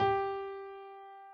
SOL stretched
Simple keyboard/piano sound
keys, fa, do, ti, music, re, mi, la, keyboard, so, Piano